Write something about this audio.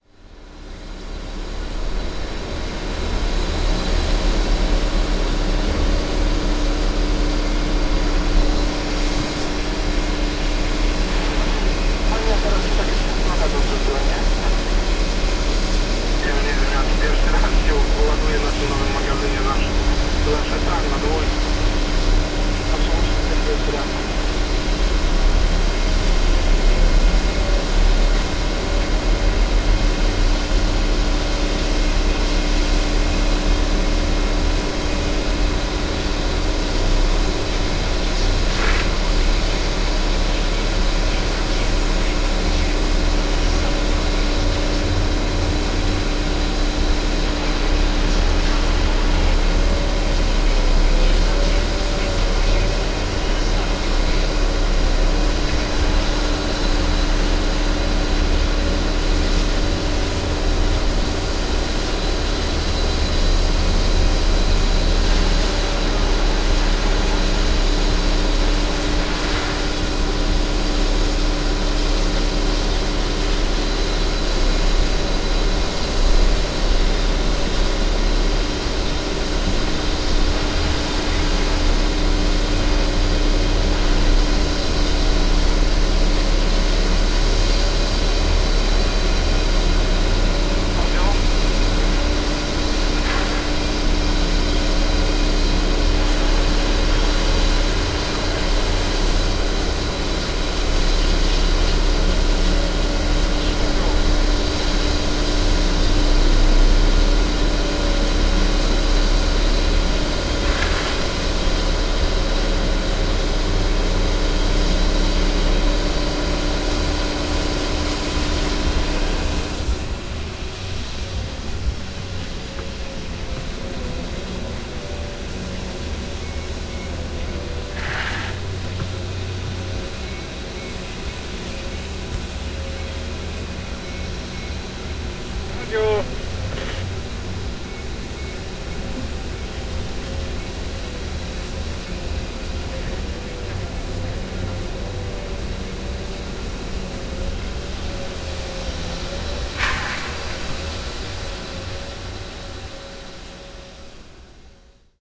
060815 teren fabryki
06.08.2015: fieldrecording made during an ethnographic resarch (cultures of freight project). The factory noise recorded in Szczecinek (in POland). Recorder Zoom H1.